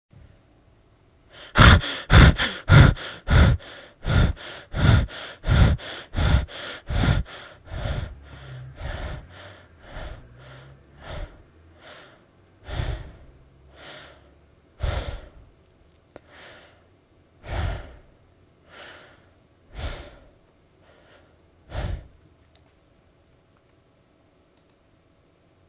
Starts out breathing hard, calms down